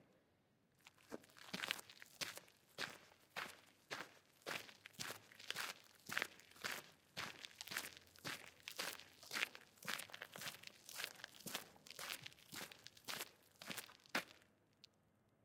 Оn the tiles had sand.